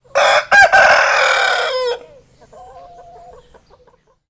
Cocorico - France, Nord Pas De Calais (French)
Sound Engineer: Jerome Bailly
Microphone Used: 2 microphones Sennheiser K6 me64 on a ORTF setup
Recorder: Nagra LB
Recorded in "Hamblain les Prés"
rooster, project, cock-a-doodle-doo, Mecanique, map, Mecaniques, son, rostre, cock, Jerome, sons, crow, Bailly, crowing